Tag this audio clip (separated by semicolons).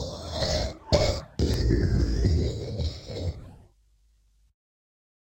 alien; big; character; choked; creature; demogorgon; design; disgusting; experimental; fi; flam; greave; monster; saliva; sci; sounds; troll; ufo; who